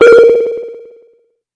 Short modulated oscillations with less modulation. A computer alerted to unknown operations.Created with a simple Nord Modular patch.
modulation, digital, synth, beep, bleep, sound-design, robot, fm, blip, nord, synthesis, modular, effect